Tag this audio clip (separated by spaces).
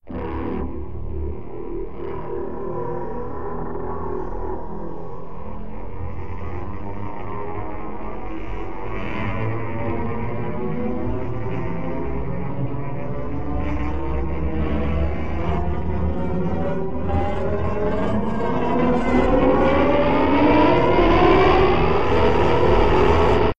aeroplane dramatic guitar processed scratch slide sound-effect